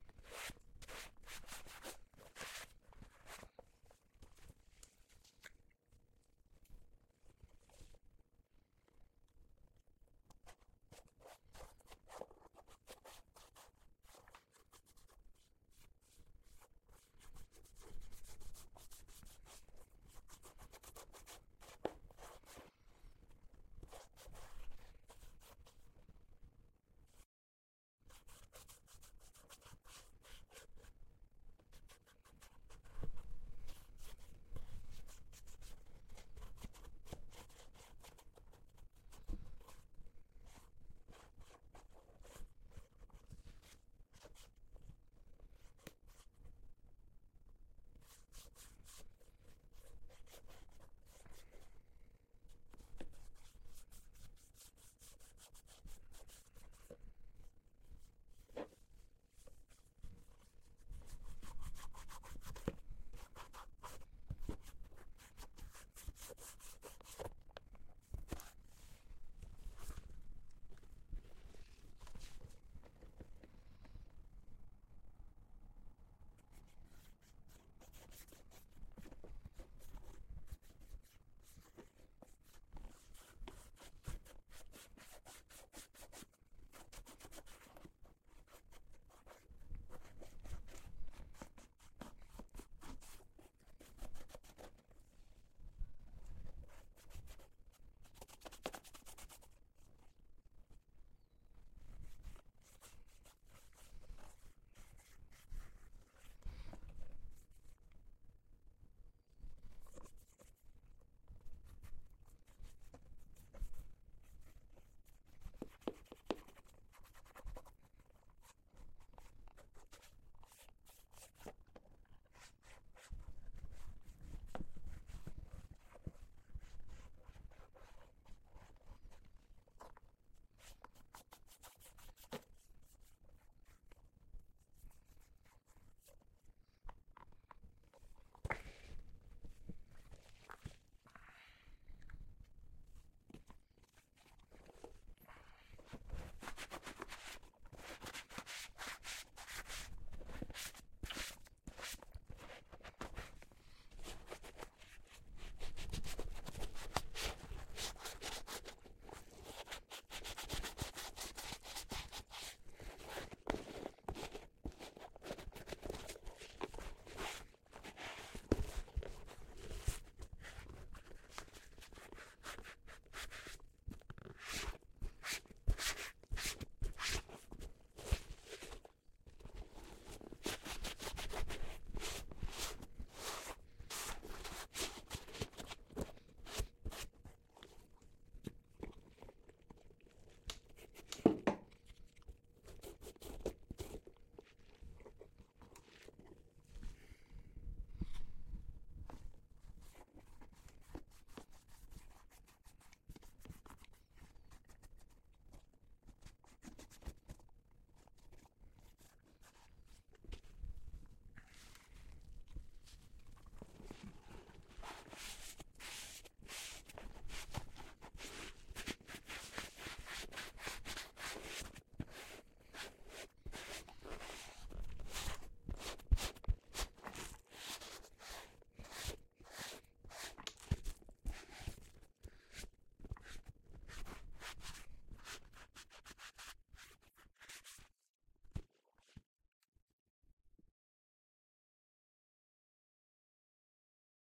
Man polishing leather shoes with sponge and brush, shoes with an embellished strip that brush gets caught on.
sponge, brush, wipe, swipe, soft, cleaning, teeth, leather, rub, brushing, hands, shoe, polish, dusting, spongy, touch, rubbing, bristles, embelished, clean, shoes, embellished, swiping, wiping, OWI, polishing